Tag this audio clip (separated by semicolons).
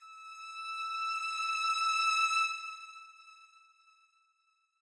Music-Based-on-Final-Fantasy; Rise; Sample; String; Violin